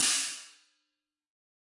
A 1-shot sample taken of a special-effects HiHat cymbal combo stack (an 18-inch Zildjian A series Ping Ride as the top cymbal, and a 20-inch Wuhan Lion series China as the bottom cymbal), recorded with an MXL 603 close-mic and two Peavey electret condenser microphones in an XY pair. The files designated "FtSpl", "HO", "SO", and "O" are all 200,000 samples in length, and crossfade-looped with the loop range [150,000...199,999]. Just enable looping, set the sample player's sustain parameter to 0% and use the decay and/or release parameter to fade the cymbals out to taste. A MIDI continuous-control number can be designated to modulate Amplitude Envelope Decay and/or Release parameters, as well as selection of the MIDI key to be triggered, corresponding to the strike zone/openness level of the instrument in appropriate hardware or software devices.
Notes for samples in this pack:
Playing style:
Cymbal strike types:
Bl = Bell Strike
Bw = Bow Strike
E = Edge Strike